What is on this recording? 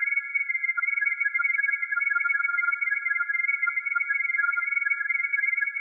This sound was meant to represent lots of blips on the screen. But it sounded too "sciency" so it was unused.